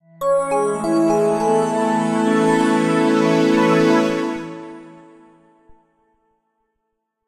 Intro-Logo Sound
An intro/logo sound effect. Recorded with Reaper, mastered with Sony Sound Forge Pro 10 and created using a mix of Native Instruments Absynth 5 and MAudio Venom synthesizers.